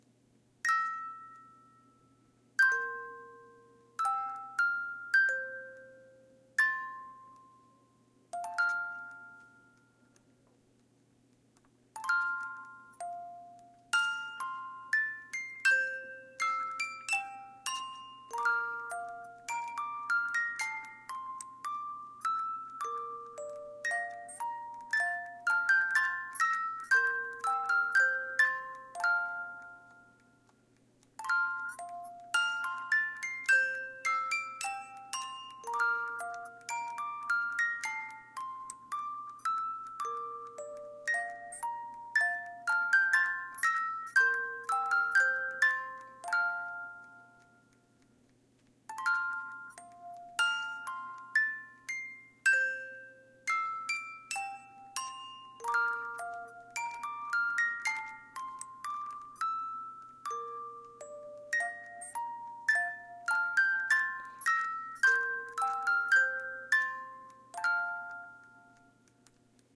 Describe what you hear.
Small music box